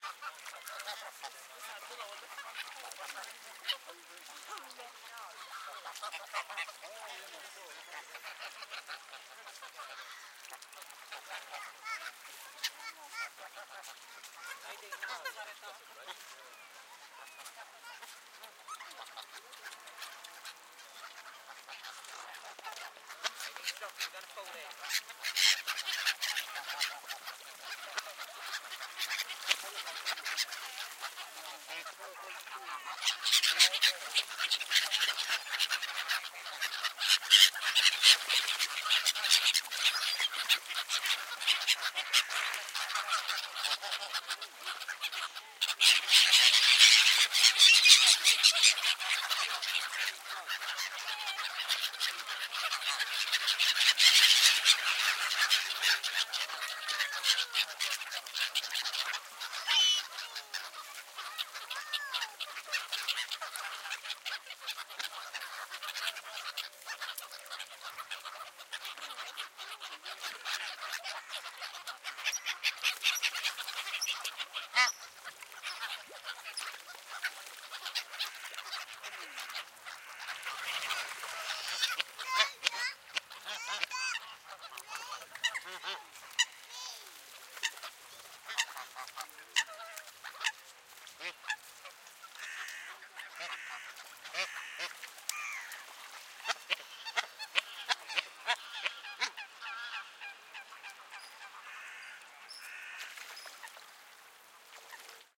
Birds in park
Birds in a park in Westminster recorded on Zoom H4
Urban, Ambiance, Passing, Environment, outside, Field-Recording, Countryside, Birds, Ducks, City, Park, Public, People, Movie